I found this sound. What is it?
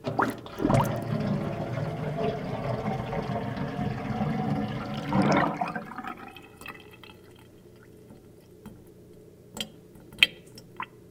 Water draining
Water going down a sink drain.